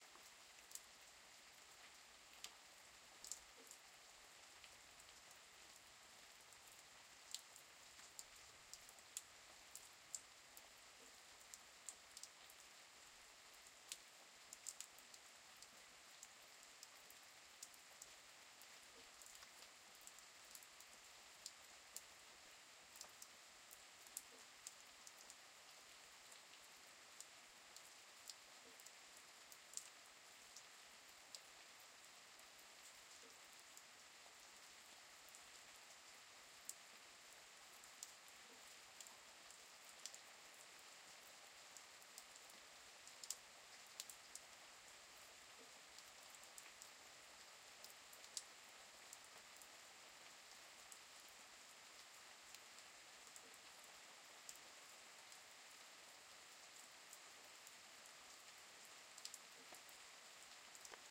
Soft rain falling in my garden.
night, rain, soft